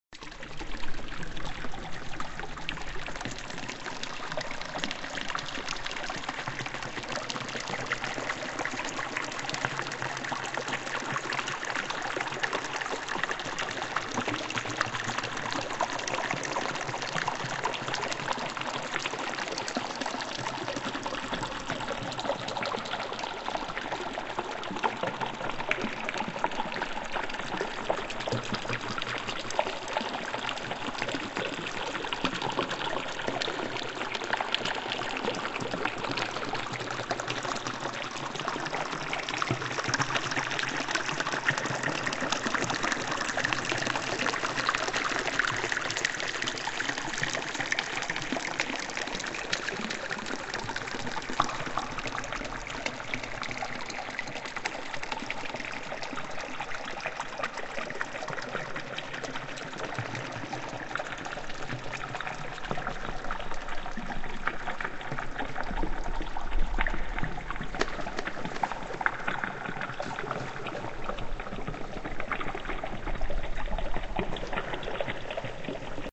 swamp-out time
in the chill-caves on swamp-planet Zurgle
bubbles, swamp